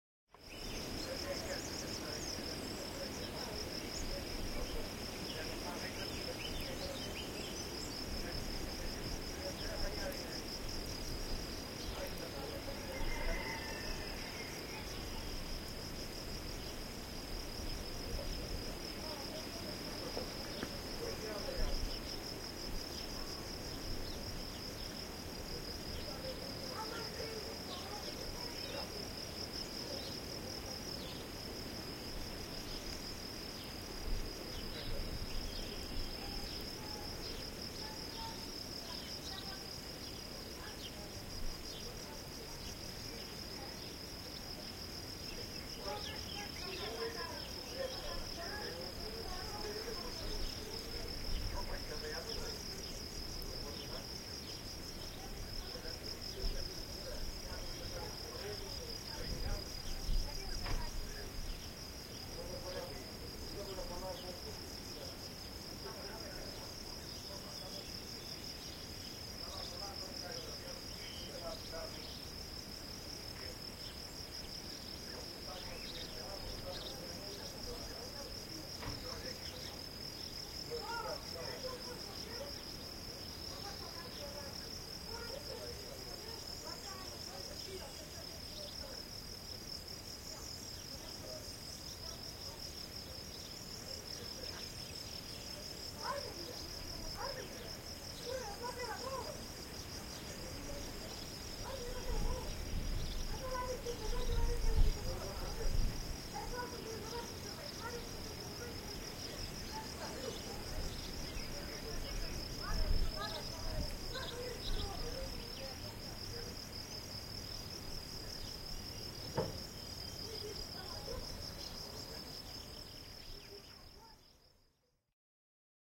Urgueira Centrodaaldeia02 48kH24
This is a field-recording in a village of 12 inhabitants, called Urgueira, belonging to the municipality of Águeda near the Serra do Caramulo in Portugal.
birds, field-recording, insects, nature